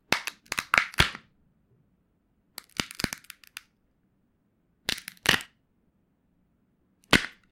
Some variation of breaking bones. If you want to have this kind of sound costumized for your project, send me a message.
I will glad to show that on my social media.
Enjoy!
Bend, Damage, Injury, soundeffect, Breaking, Fight, sfx, sound-design, Crash, Pain, effect, sound, fx, sounddesign, Bones, Break, NajlepszaZonaToWitkotka